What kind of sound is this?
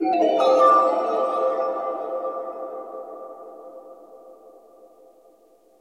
Mysterious sound jingle